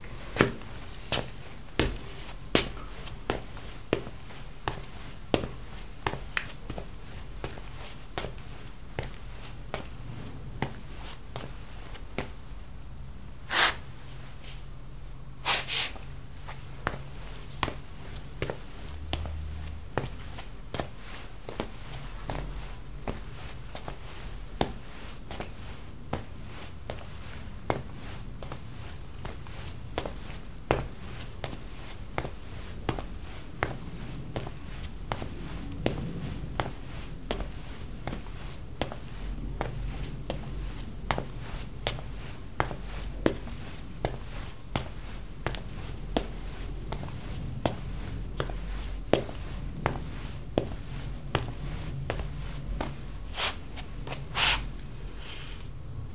Pasos suaves 02
Pasos lentos y suaves en loseta. slow and soft Footsteps in tile.